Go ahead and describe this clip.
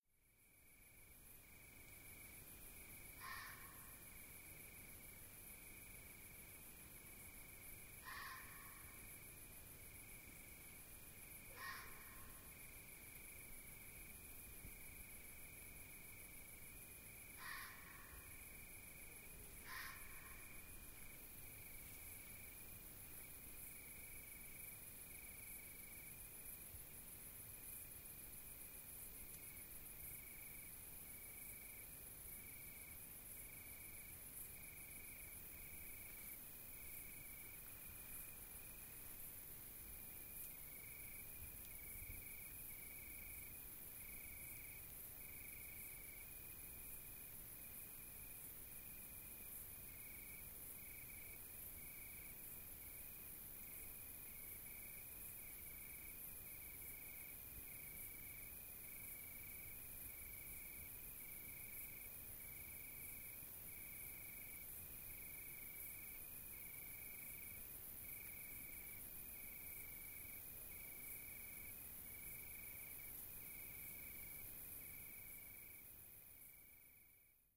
First, I thought it was a night-bird but I could not identify it clearly and I never heard an owl shouting like that. Then I understood why when I found on internet a sample exactly like mine...
Now I know it was a barking roe deer (Capreolus capreolus).
"When alarmed, it will bark a sound much like a dog" (wikipedia)
summer, deer, crickets, night, bugs